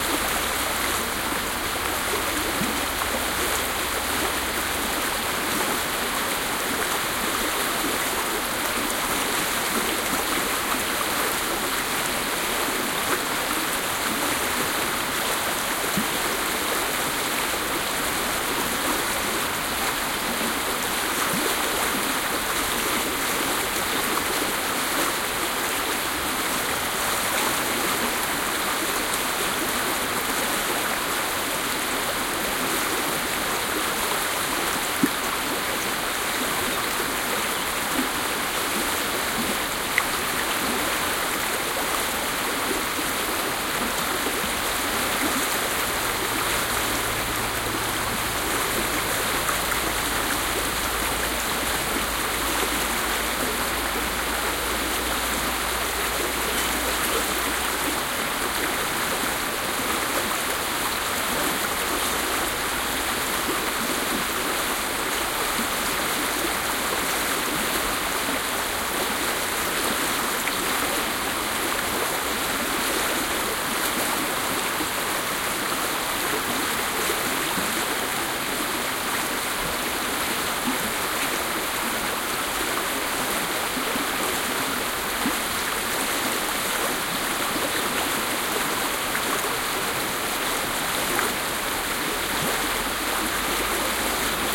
An ideal gear to carry on a walk is the Sharp MD-DR 470H minidisk player and the Soundman OKM II binaural microphones, because both don´t take much space and make good recordings.
This recording was done on the 03.06.2006 in the Harz
Mountains, the first major hills in the north of Germany. The stream is
called the Innerste and it was pretty full of water. A nice clear sound.